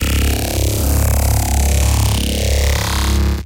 DIA S15 Warped Bass - B (20)
Cutted parts of an audio experiment using Carbon Electra Saws with some internal pitch envelope going on, going into trash 2, going into eq modulation, going into manipulator (formant & pitch shift, a bit fm modulation on a shifting frequency at times), going into ott
Carbon-Electra, Distortion, EQ-Modulation, FM, Formant-Shift, Freestyle, Frequency-Shift, Manipulator, Pitched, Pitch-Shift, Sound-Design, Trash2